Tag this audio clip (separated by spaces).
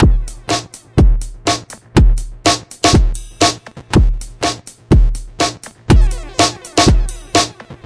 122bpm drum electronic glitch loop loopable noise rhythm rhythmic